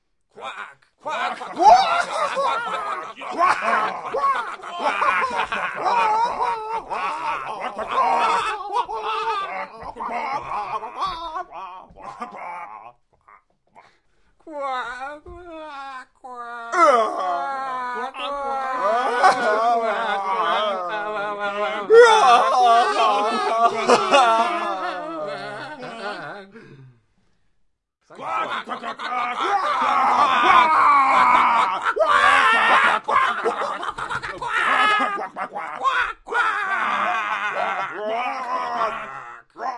Group of frogs screaming, being victorious, happy, fearful, sad.